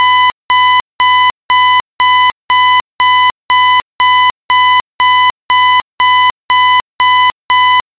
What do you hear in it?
Believe it or not this is not a recorded sound. It was completely synthesised in Audacity with a 1kHz saw wave tone. A digital alarm clock sample that can be seamlessly looped.
synthesised, wake-up, loop, 1kHz, beeping, saw-wave, noise, synth, alarm, beep, seamless, clock, digital, 1000hZ, buzzer, alarm-clock, electronic